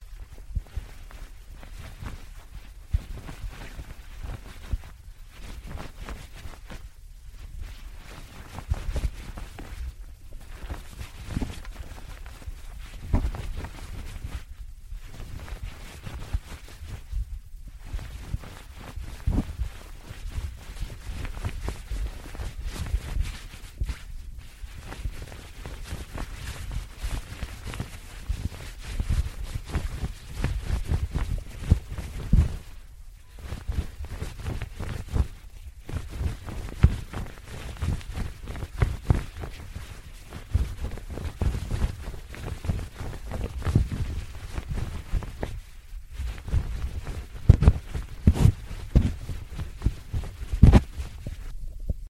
Live recording of fluffing and rubbing a piece of cloth.
cloth, field-recording, rustling